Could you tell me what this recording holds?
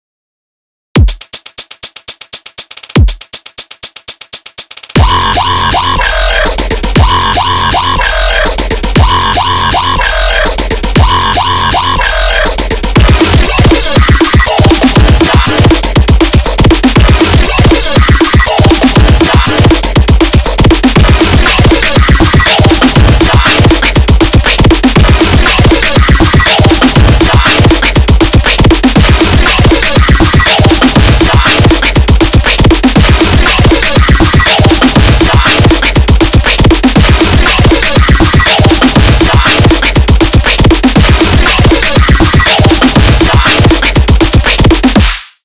A short EDM Dubstep song that was created using Sony ACID Pro, Because the audio was originally over 1 minute long, I have separated it into two uploads, so if you want the full thing, you're going to have to attach Part Two onto the end of Part One yourself.
But it still sounds good on it's own
Epic tunes
Music, EDM, Fast-pace, Dubstep, Techno